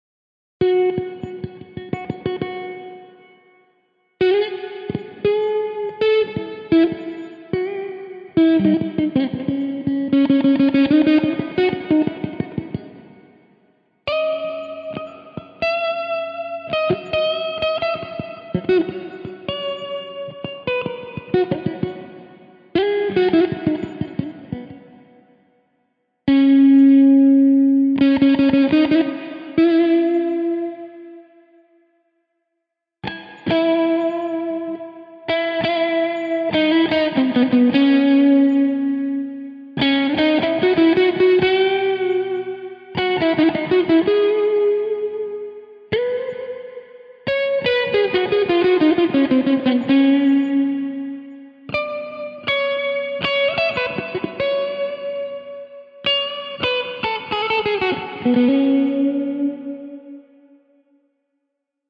Hey - this is just a one file , You may be interested in lots of these great guitar sounds here
- it is sonoiz marketplace wiht my sounds
acoustic, acoustic-guitar, blues, blues-guitar, electric, electric-guitar, guitar, heavy, metal, riff, rock